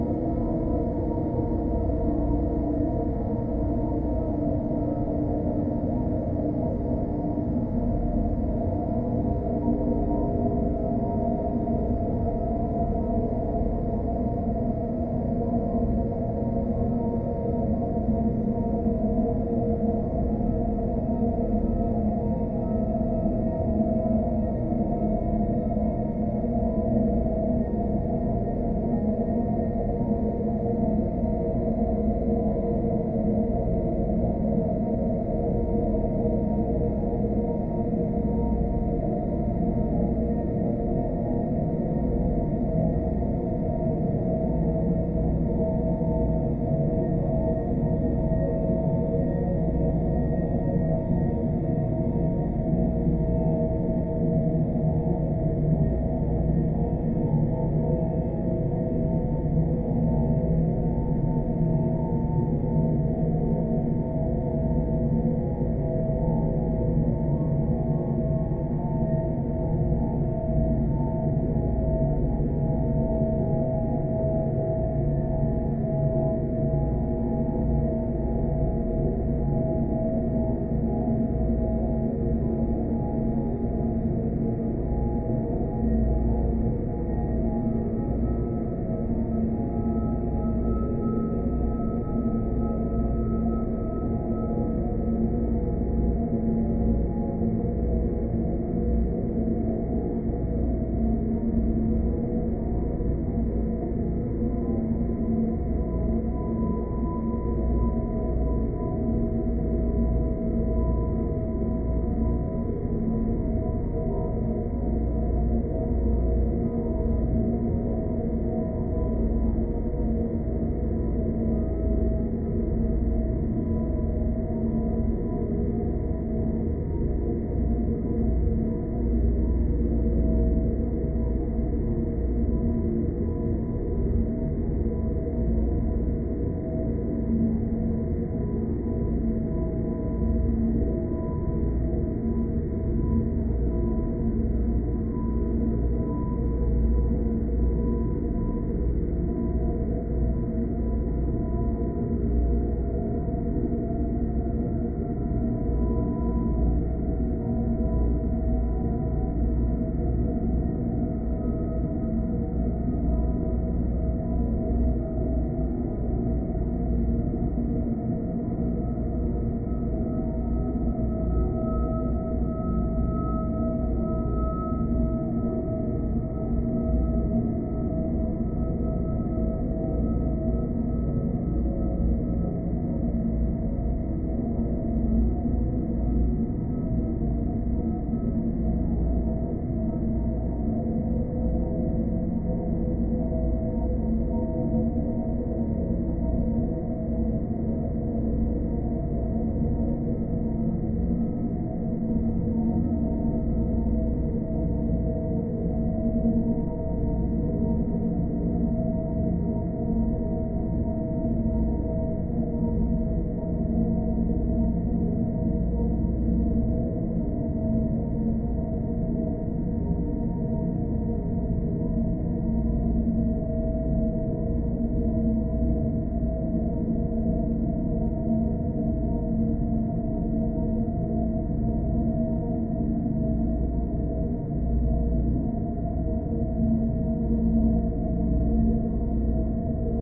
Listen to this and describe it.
Dark drone sound created as byproduct of my dark ambient track "Tuturinton".
It was created by stretching and dehissing one sample multiple times, and then
applying convolution, where non-strtched sample version processed with shimmer effect was used as impulse response.
atmosphere, dark, drone